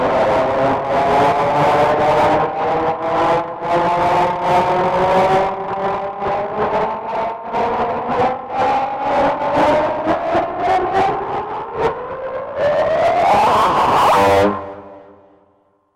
Sounds produced scratching with my finger nail on the strings of an electric guitar, with lots of distortion applied. Recording was done with an Edirol UA25 audio interface.This set of samples are tagged 'anger' because you can only produce this furious sound after sending a nearly new microphone by post to someone in France, then learning that the parcel was stolen somewhere, and that you've lost 200 Euros. As it happened to me! EDIT: the mic eventually reached destination, but three weeks later, thank goodness!)
(Ok, I'll write it in Spanish for the sake of Google: Esta serie de sonidos llevan la etiqueta 'ira' porque uno los produce cuando mandas un microfono por correo a Francia, roban el paquete por el camino y te das cuenta de que Correos no indemniza por el robo y has perdido 200 Euros. Como me ha pasado a mi. EDIT: el micro llegó a detino, pero tres semanas mas tarde)